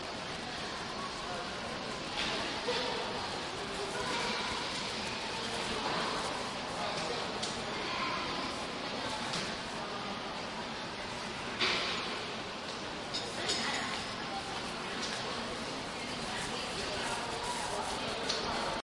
washington naturalhistory bathroom
Outside the bathroom in the Natural History Museum... ignore the crackling in the left channel, it's modern art recorded with DS-40 and edited in Wavosaur.
field-recording, natural-history-museum, road-trip, summer, travel, vacation, washington-dc